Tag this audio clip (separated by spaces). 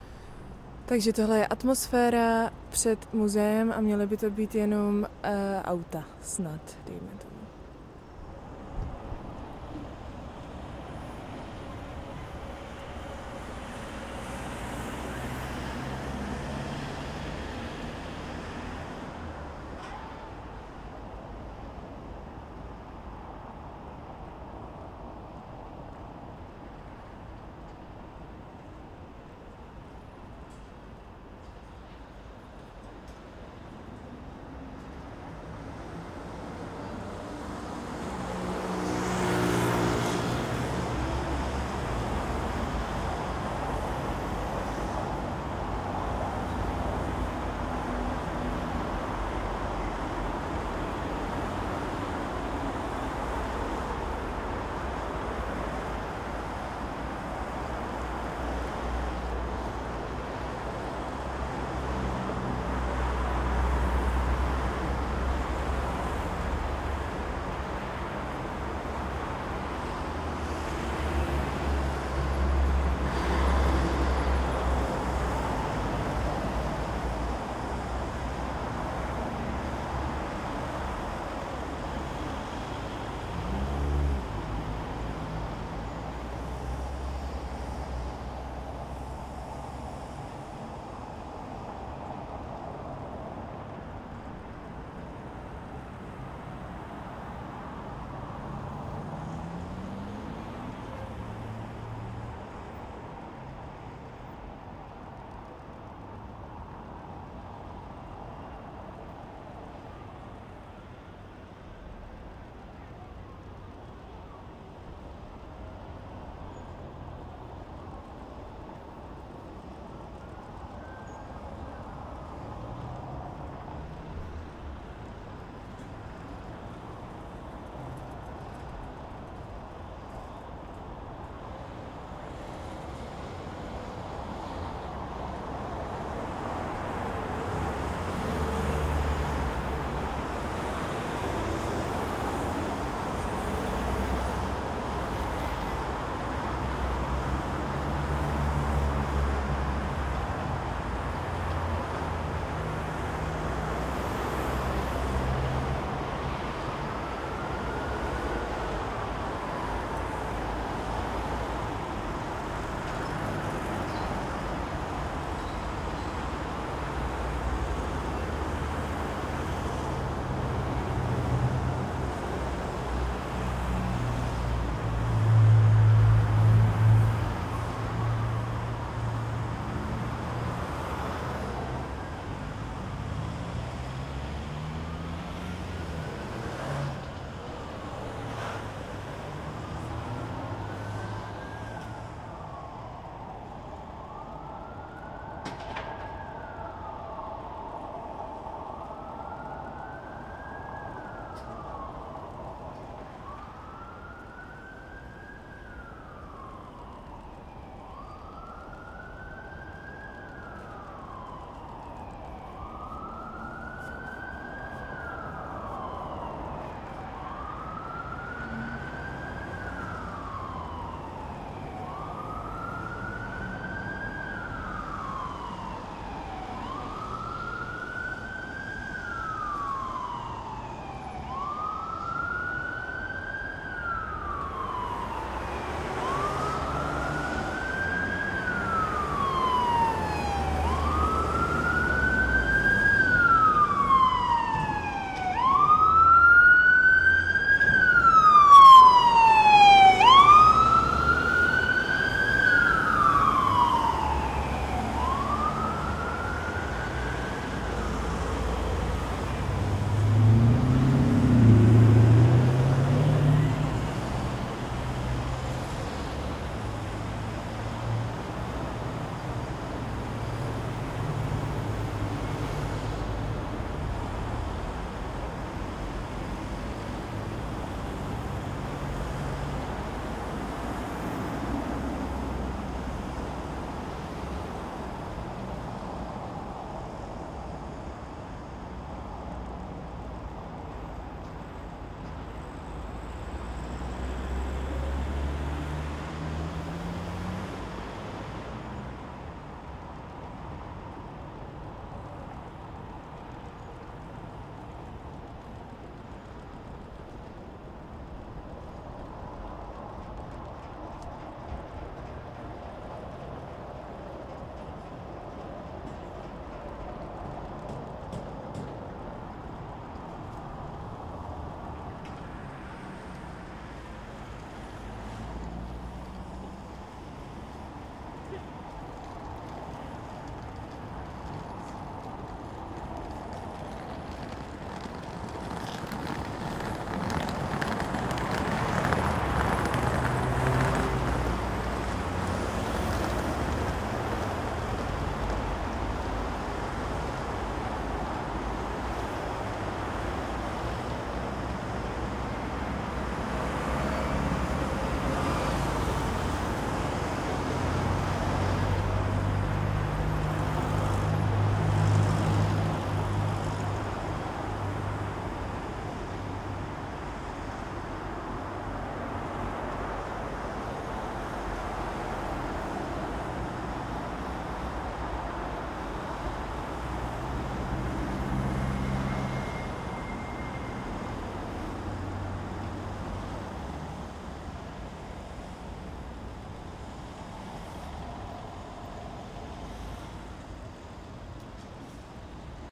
traffic street road city car cars noise